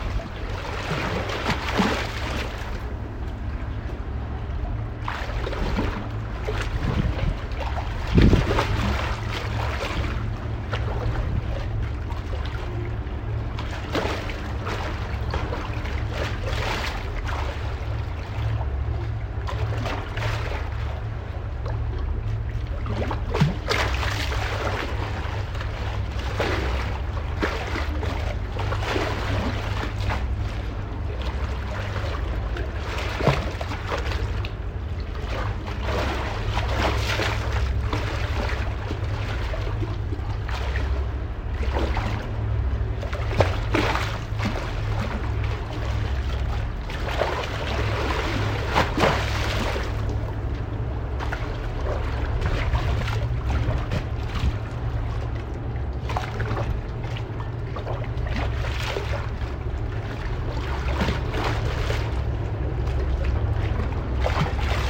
The sound of River Thames at Shadwell
We went for a long walk today and stopped by the river to record it.